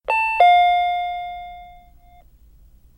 "Electric Eye" entry chime, suitable for any convenience store, liquor store, dry cleaner's storefront, or any similar strip-mall small retail shop.
I was working on a project, and needed a suitable sound for a 'convenience store' style entry chime. Walk through the light beam, and the chime rings out to let the person in the back know that a customer has come in. Since these systems in real life come from probably hundreds of different manufacturers, it's hard to pin down exactly "the sound".. especially since there's so many.
This sound hits all the right notes for what I think of, when I think of going to a mom-and-pop convenience store.
Sound is available in three versions:
Based on "Door Chime 3" by Taira Komori
beep, bell, bells, business, chime, convenience, corner, ding, dong, door, doorbell, doorchime, electric, enter, entry, exit, eye, liquor, mall, retail, ring, sensor, shop, store, strip, stripmall